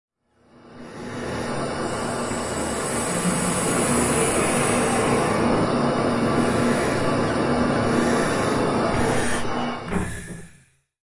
Chamber Gas 1
Pressurising a hyperbaric chamber. Audio taken from a GoPro H4 Black
pressure, submarine